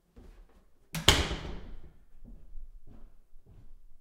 basement door closing.